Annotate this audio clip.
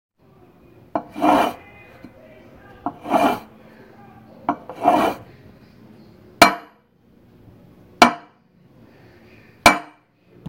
Sliding Metal Cup Hit Table at the End
Sliding a metal cup or mug on a wooden table with a bang on the end.
Recorded using Hi-Q app by Audiophile on a Samsung Galaxy S7.
Bang, Cup, Metal, Mug, Slide, Sliding, Table, Wooden